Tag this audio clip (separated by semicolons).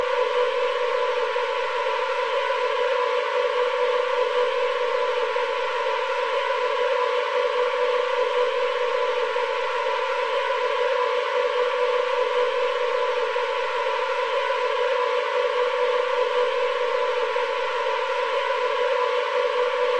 atmosphere; creepy; horror; evil; suspense; strings; drone; loop